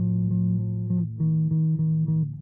recording by me for sound example for my course.
bcl means loop because in french loop is "boucle" so bcl